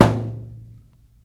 Metal object hit
bass, kick, boom, low